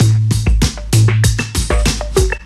squish beat%
robotic feeling breakbeat.